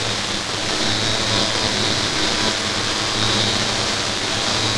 modverb freeze 123020
Buzzing modulated reverb.
reverb, electronic, digital, noise, static, electric, buzzing, hiss, synth, modulation, fuzz, glitch, grinding, buzz